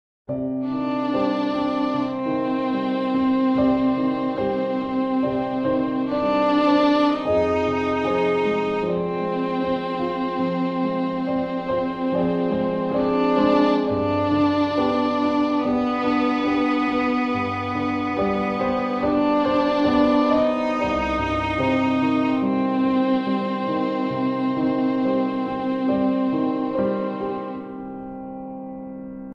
I played a little piano and added violin sound for you. Hope you like it
cinematic, emotional-music, strings, film, movie, piano-violin, classical, drama, dramatic